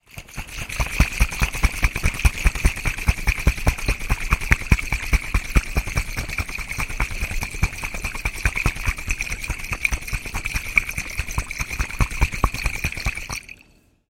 Shaking of glass water bottle. Recorded using mono microphone and ensemble. No post processing
00-M002-s14, glass